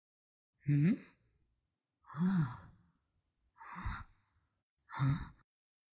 Sounds recorded for a personal project. I recorded myself being curiously surprised and shifted the pitch to a more female voice range.